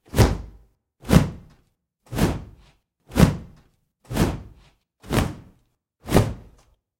WHOOSH (multiple)
Need an airy, whoosh-y sound? No? Well, here these are, anyway.